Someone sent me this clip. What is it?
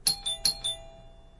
door chime2
apartment, bell, chime, chiming, door, doorbell, house, open, ring, ringing